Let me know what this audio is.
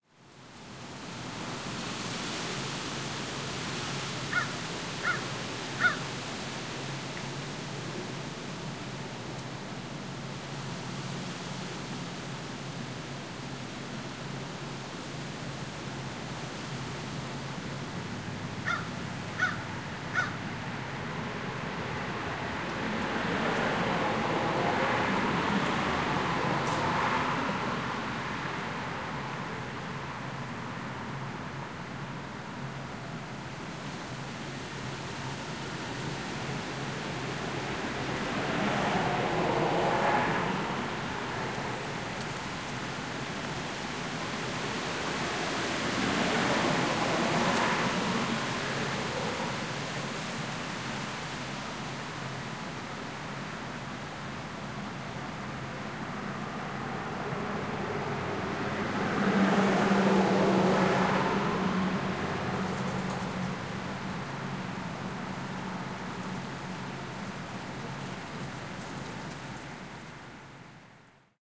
bird calls leaves swirl wind blows and traffic passes
A bird calls as the wind blows through the trees and traffic passes. You can hear the leaves clattering on the concrete.